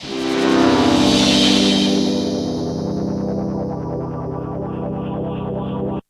Wobble Slicer C3
Wobble Slicer Pad